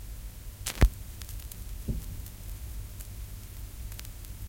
Record Player - Placing Needle on a Track With crackle
Recording of a record player on a vinyl long playing record
Needle, Player